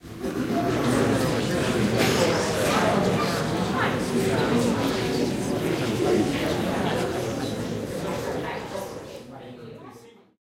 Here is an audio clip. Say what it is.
These are canned crowd sounds, recorded for a theatrical production. These were recorded in quad, with the design to be played out of four speakers, one near each corner of the room. We made them with a small group of people, and recorded 20 layers or so of each reaction, moving the group around the room. There are some alternative arrangements of the layers, scooted around in time, to make some variation, which would help realism, if the sounds needed to be played back to back, like 3 rounds of applause in a row. These are the “staggered” files.
These were recorded in a medium size hall, with AKG C414’s for the front left and right channels, and Neumann KM184’s for the rear left and right channels.